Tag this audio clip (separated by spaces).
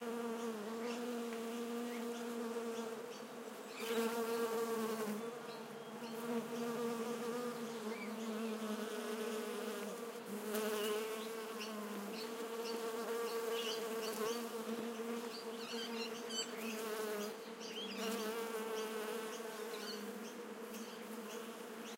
bee bees buzzing flies flower fly garden honey insects pollination spring vibrate